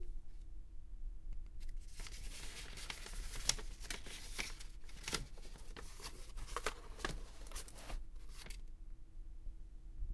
Rustling through paper
Rustling through old documents not worthy of being gently leafed through.
foley, Paper, soundfx, rustle, rustling